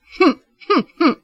regular show style laugh
mimicking some things usually heard in "regular show"
voice, show, regular